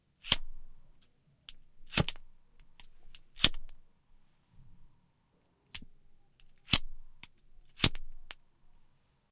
noise of a cigarette lighter, recorded using Audiotechnica BP4025, Shure FP24 preamp, PCM-M10 recorder
cigarette, clipper, collection, disposable, flame, gas, ignition, lighter, smoking, spark, tobacco, zippo